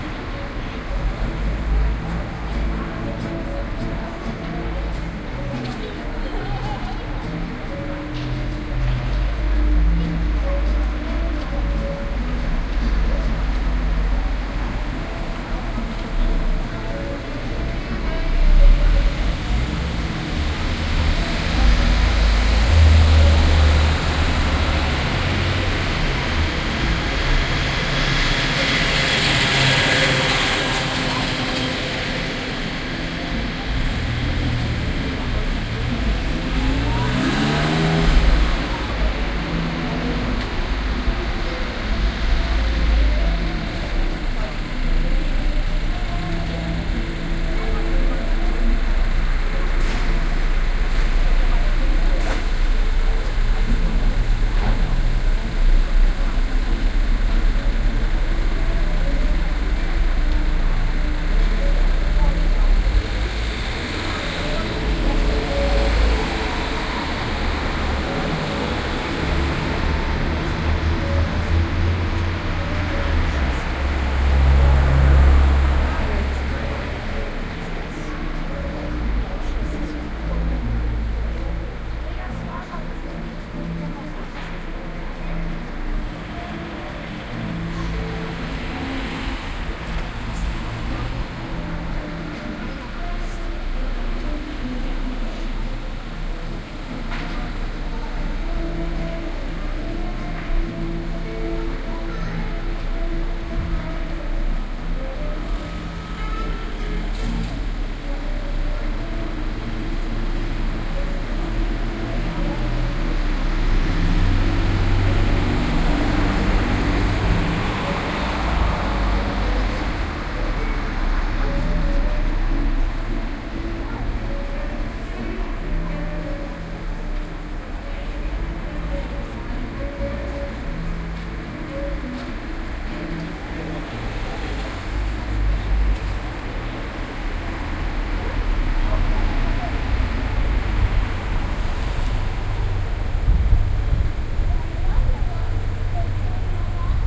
street party
A short clip of a streetparty in Hannover/Germany, recorded from a balcony, using Soundman OKM II microphones and a Sharp IM-DR 420 MD recorder. The road wasn't
blocked off, so therefore same traffic, giving the whole recording a
different feel, like that it has been recorded in the south.
atmosphere, binaural, field-recording, music, nature, party, town